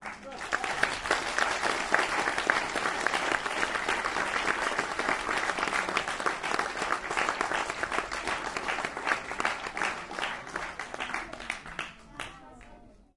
Audience of about 150 people applauding in a cinema. Recorded on an Edirol R-09 with built-in mics.